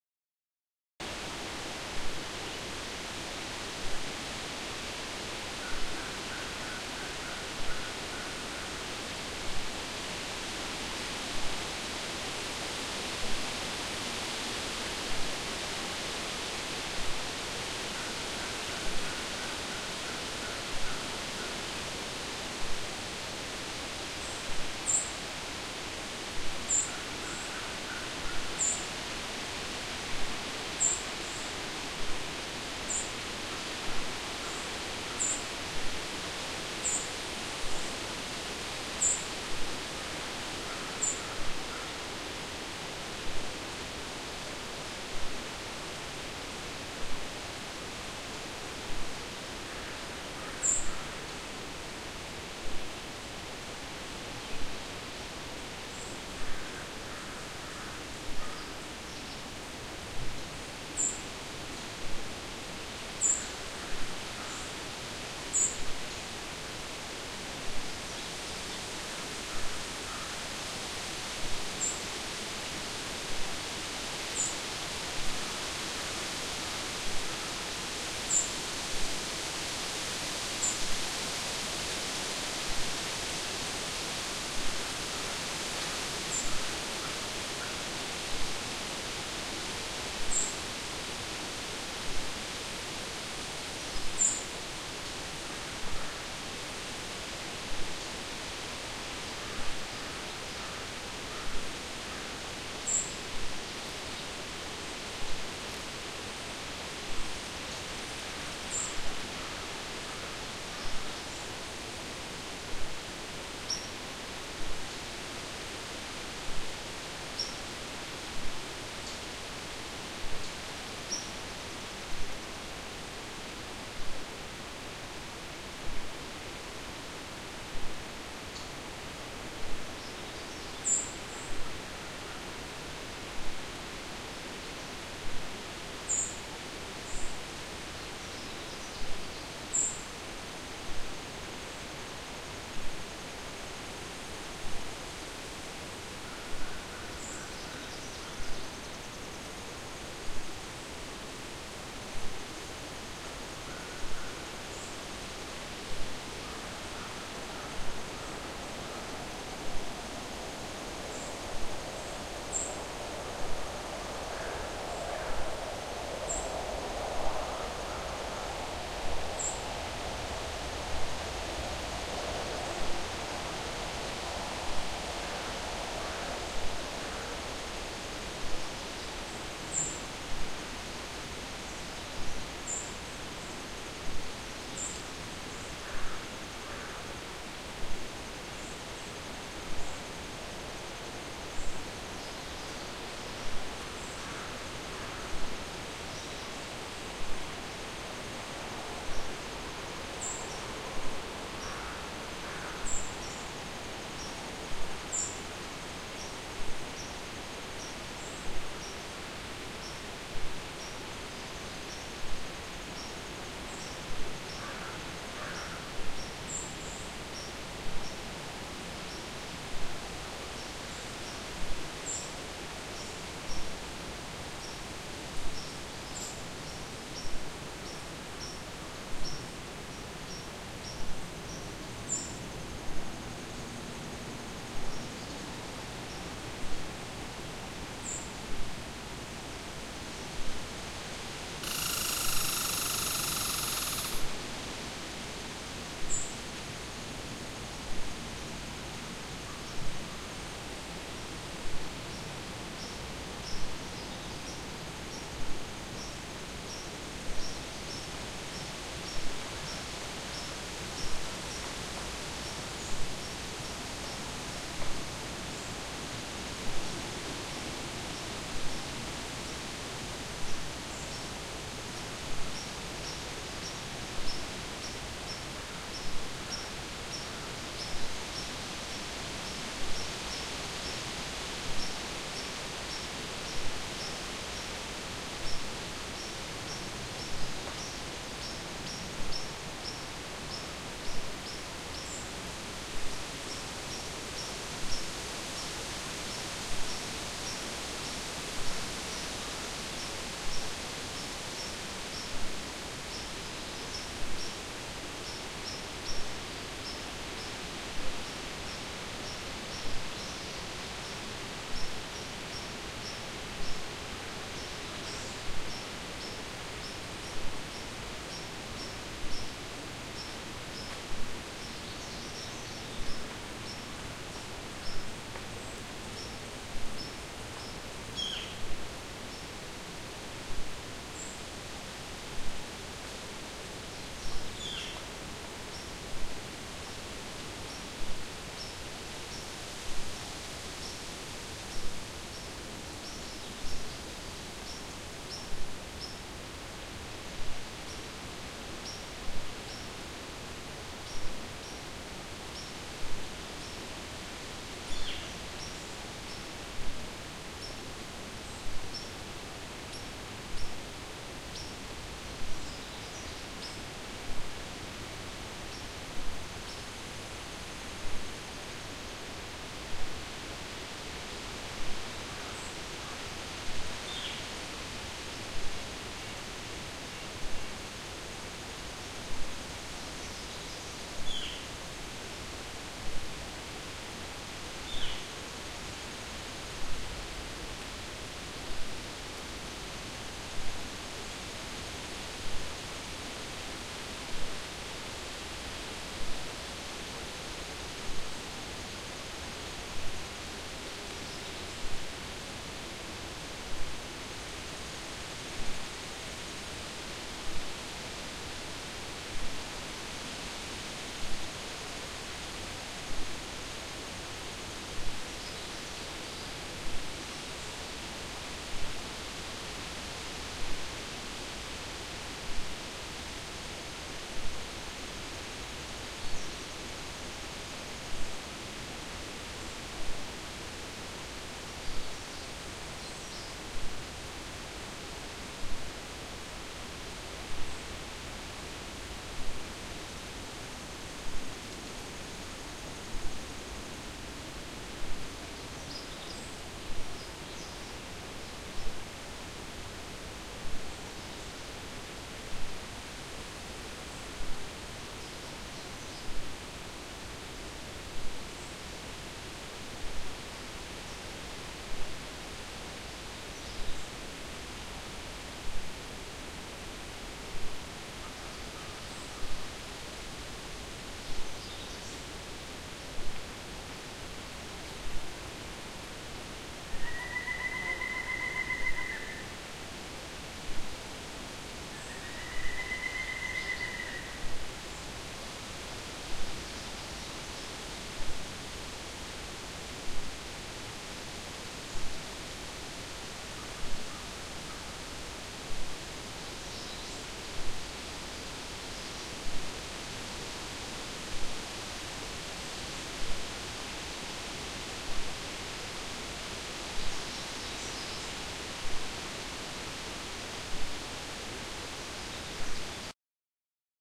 Forest Aspen Dawn Wind Ligh
recorded early summer morning in Whiteshell Provincial Park in Manitoba Canada. I would appreciate feedback in regards to quality as I intend on recording and adding more sounds this pack soon.
ambience, forest, light, wind